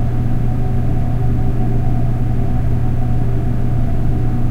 Short (but non-obvious) loop of ambient ventilation fan drone in a small concrete room. Sounds good slowed down by 50% for a bigger fan in a bigger room. Recorded with microphones tucked behind ears for fairly good binaural effect.